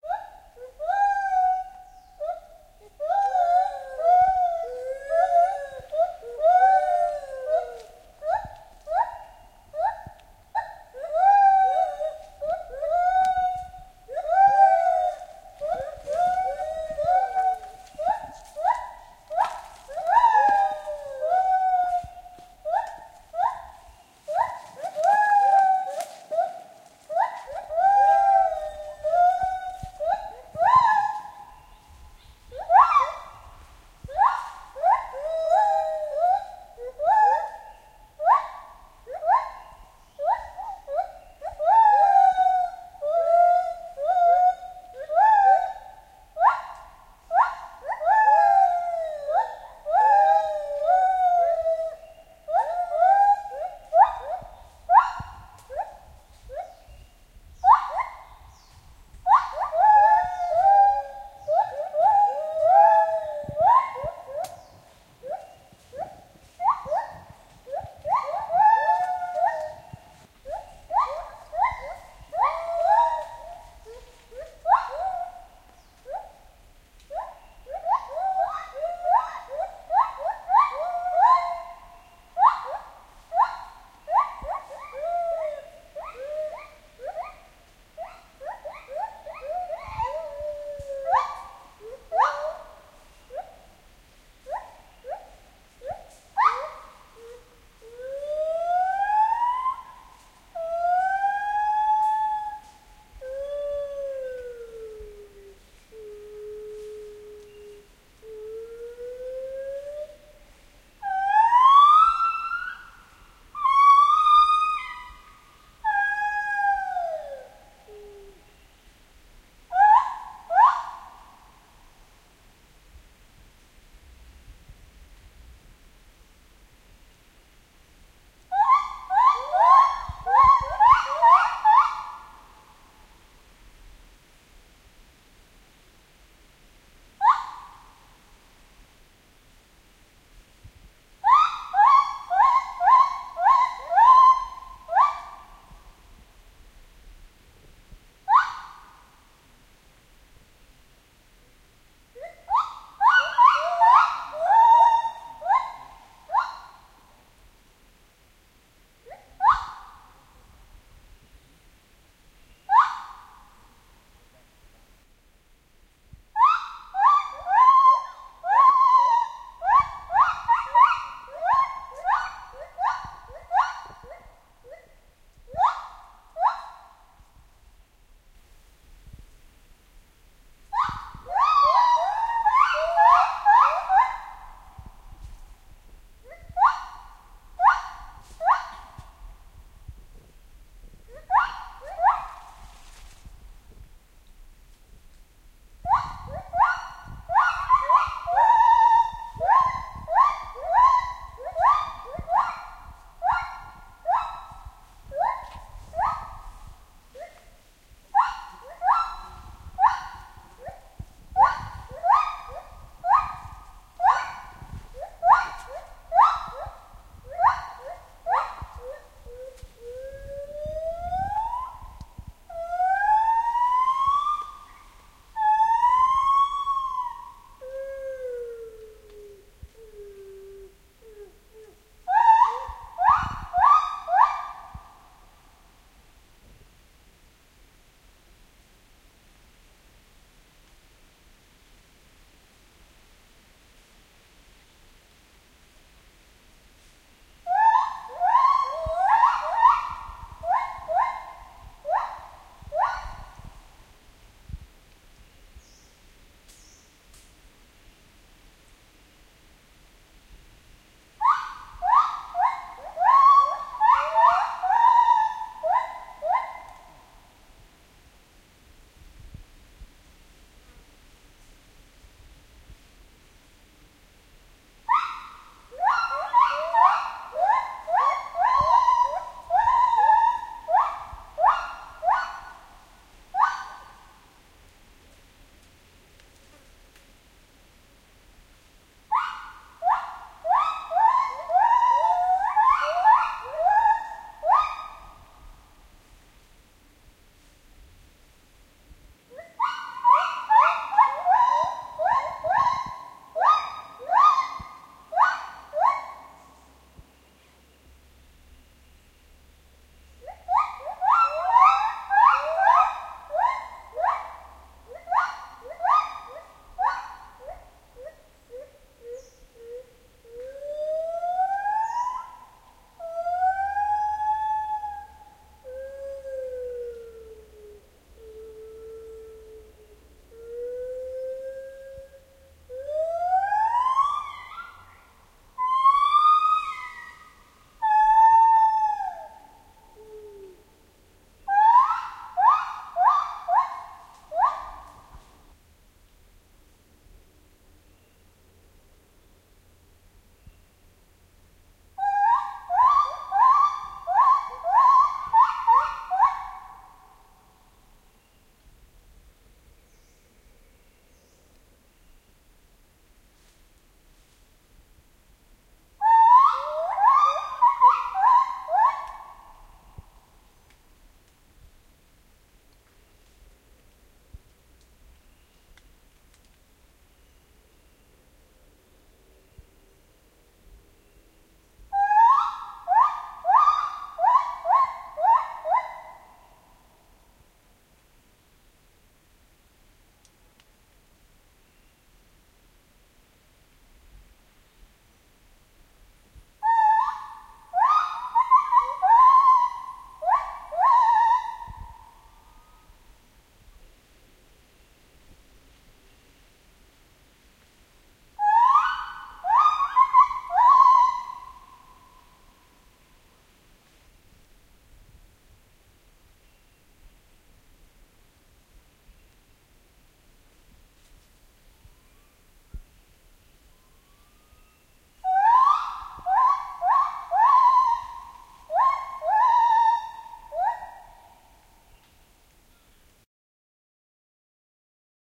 Gibbons-Kao Yai National Park
Family of White-Handed Gibbons, recorded in Kao Yai National Park, Thailand.
Thai, Kao-Yai-National-Park, Kao, Yai, nature, rain, tree, field-recording, Hylobates, gibbon, natural, tropical, primate, ape, hoot, monkey, jungle, beast, tropic, call, Park, Thailand, forest, lar, White-handed-gibbon, National, trek, animal